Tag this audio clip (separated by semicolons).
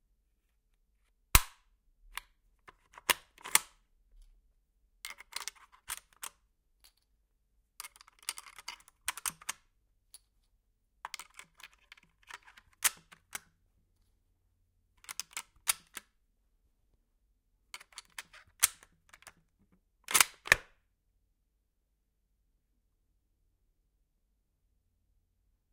30-06; marksman; dryfire; rack; click; rifle; ammunition; indoors; gunfight; shot; load; ammo; war; spring; bolt; sniper; reload; WW2; gun; cartridges; firearm; Weapon